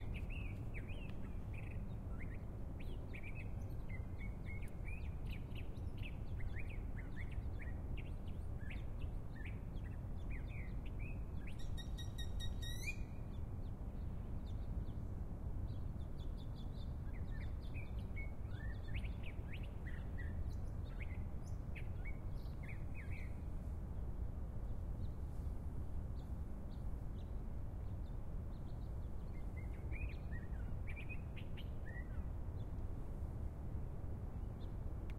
Field recording of various birdsongs occurring simultaneously one autumn morning on the Mesa Community College campus.
Have a blessed day!
autumn, beauty, nature, college-campus